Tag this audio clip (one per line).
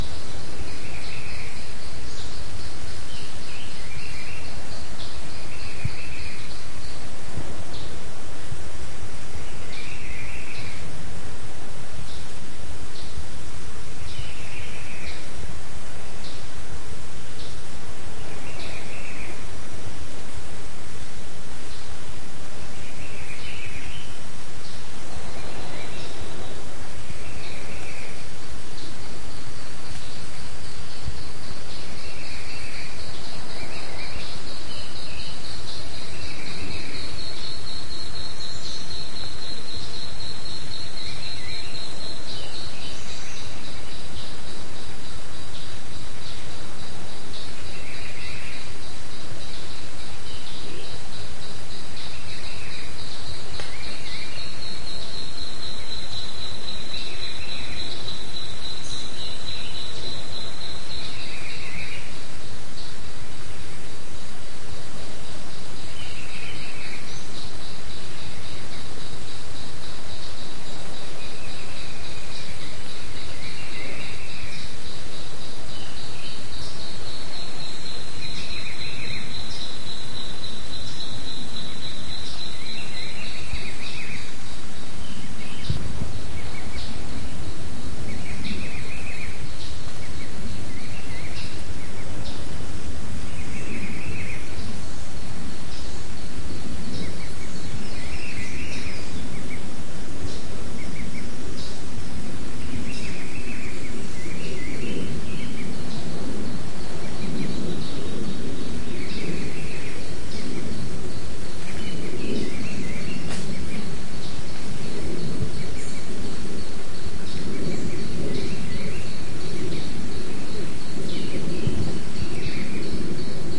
birds
field-recording